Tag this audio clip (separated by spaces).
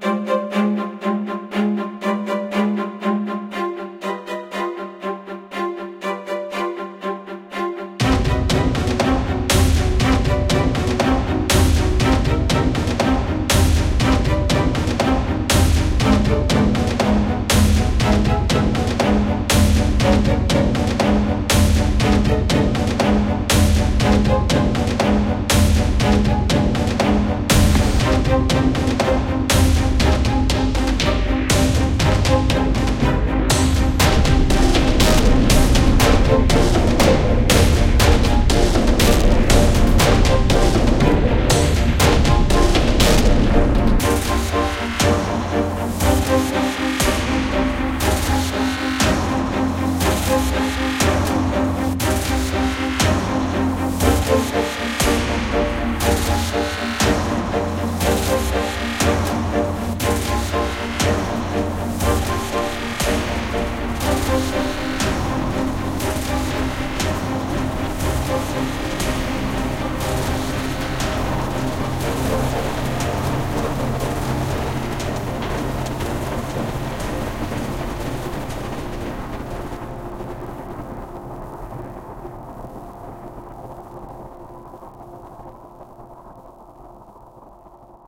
movie dramatic suspense increasing cinematic symphony string drama strings orchestral film